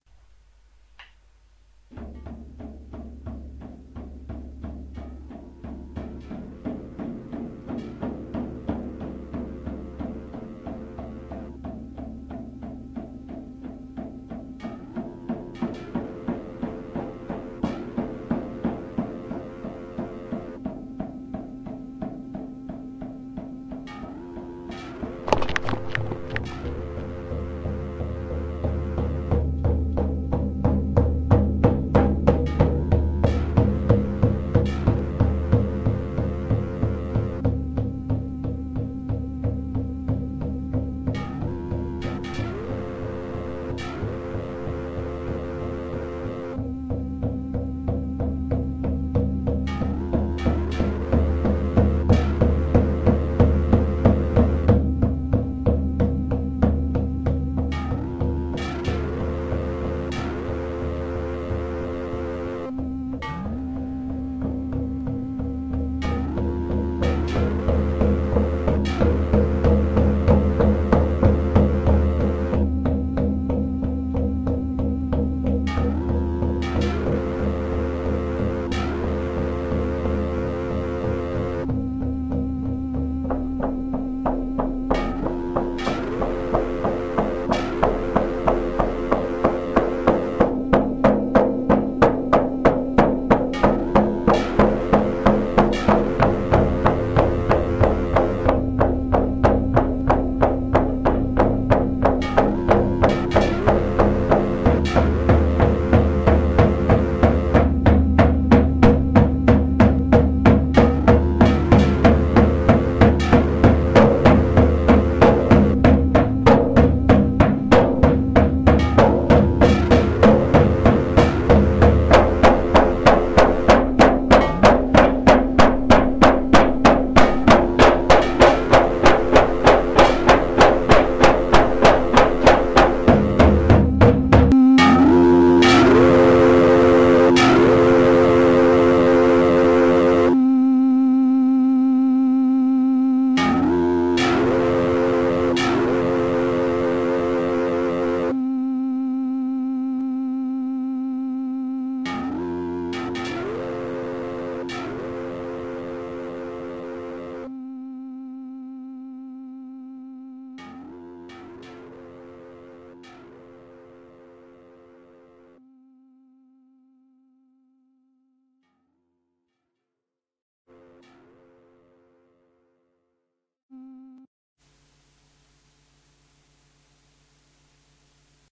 I want honor the cherokee tribe with this short sound, which you may also call music.
cherokee, natives, USA